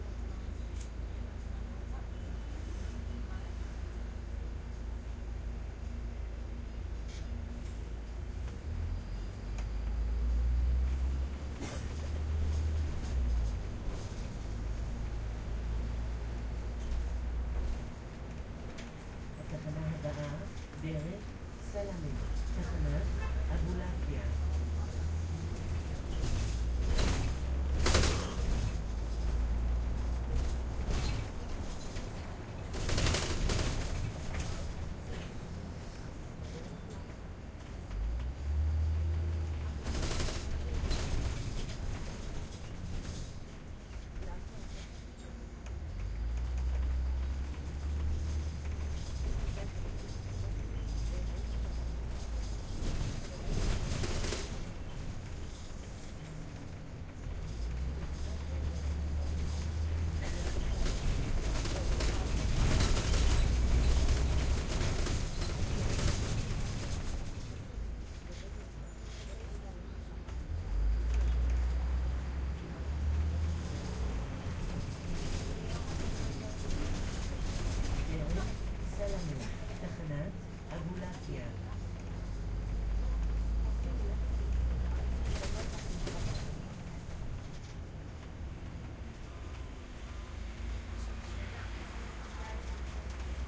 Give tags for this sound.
Bus
Neumann
Ride

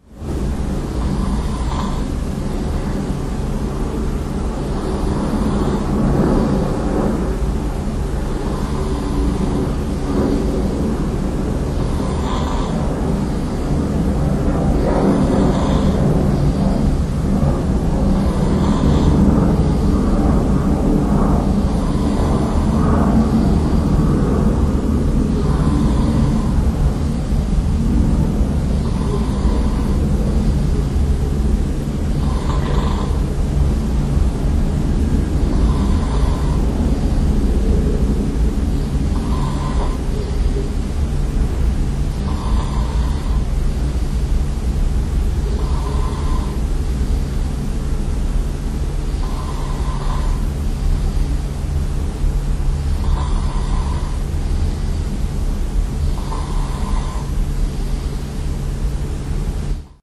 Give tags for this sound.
airplane
bed
engine
field-recording
human
lofi
nature
noise
traffic

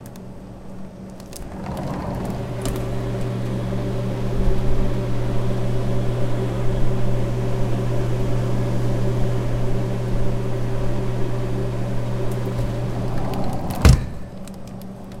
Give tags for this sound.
cold
interior

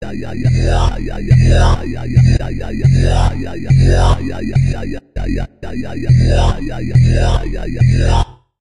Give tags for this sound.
wub
dubstep
140bpm
fl
yaw
loop
yay
ayayayayyay
beat
talking-synth
bass

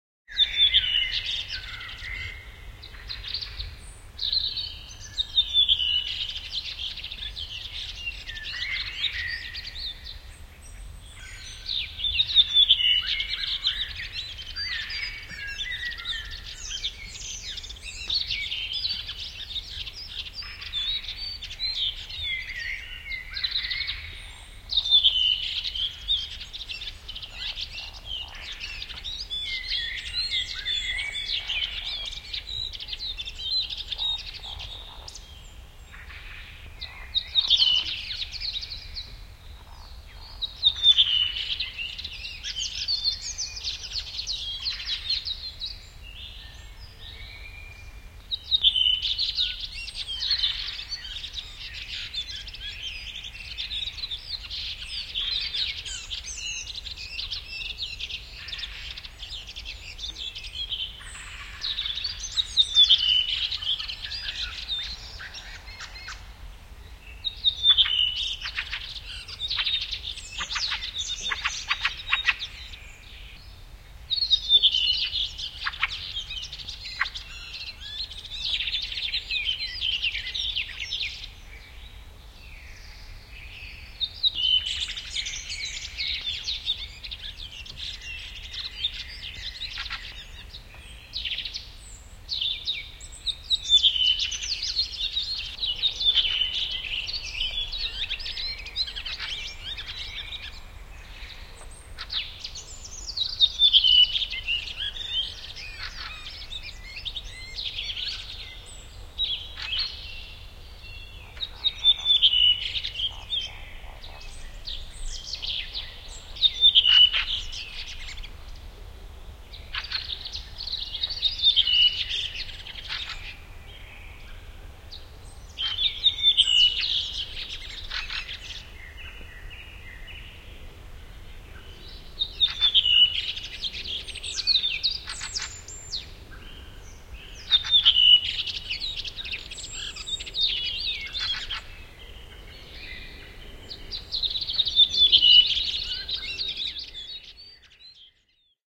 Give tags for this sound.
Bird
Birds
Birdsong
Field-Recording
Finland
Finnish-Broadcasting-Company
Forest
Linnunlaulu
Linnut
Lintu
Luonto
Nature
Punakylkirastas
Redwing
Soundfx
Spring
Suomi
Tehosteet
Yle
Yleisradio

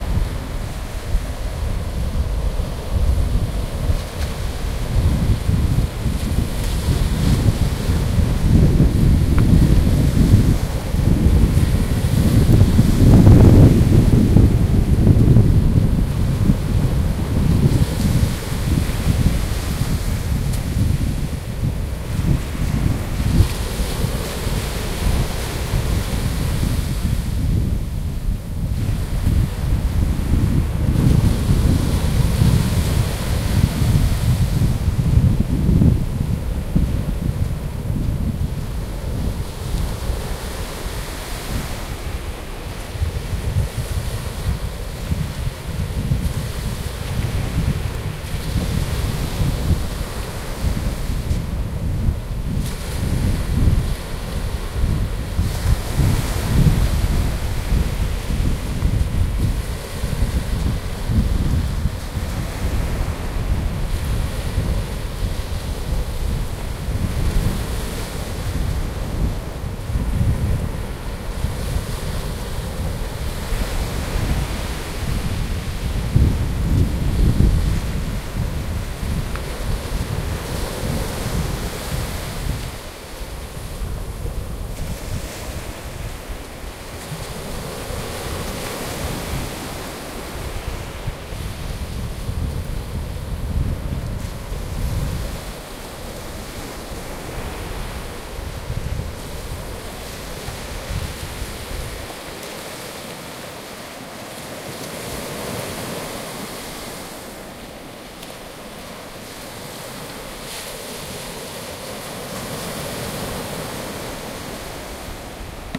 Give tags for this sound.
waves
shore
H2n
darss
nature
sea
field-recording
beach
baltic-sea
water
wind

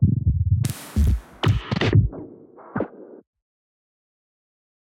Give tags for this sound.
breakcore
bunt
digital
drill
electronic
glitch
harsh
lesson
lo-fi
noise
NoizDumpster
rekombinacje
square-wave
synthesized
synth-percussion
tracker

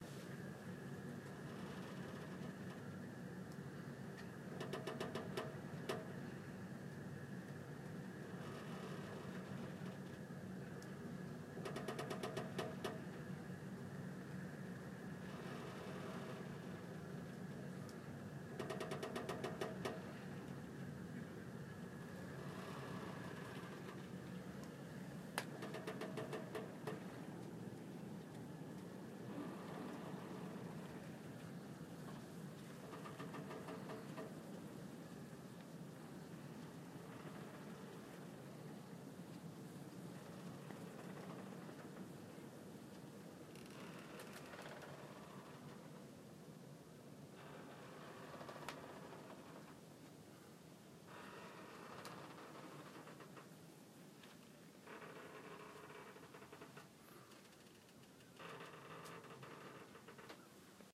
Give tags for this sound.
skyscaper
Wind
WTC
ship

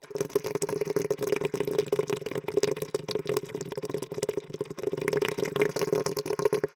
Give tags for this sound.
bong
hose
sip
slurp
straw
suck
water

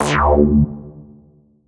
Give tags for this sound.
Sound
Synth
synthetic

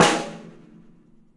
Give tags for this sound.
live
percussion

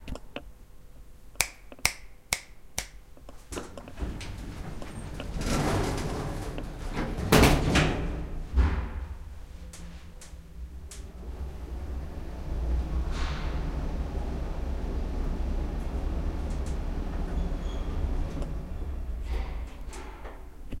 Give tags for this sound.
elevator
open
sliding-door
metal
close
door
lift
elevator-door
Rattle
elevator-ride

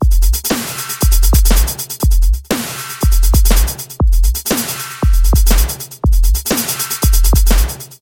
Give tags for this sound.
120
120bpm
bass
beats
deep
drum
loop
rhythm

